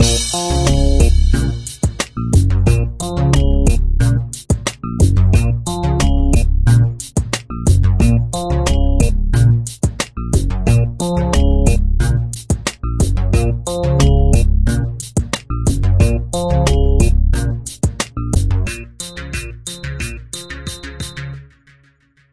Hip hop beat made in FL Studio with stock sounds.
Produced and written by: NolyaW & R3K4CE
(M.M.)
damn, instrumental, R3K4CE, NolyaW, ran, ranDAMN, hip, hop, rap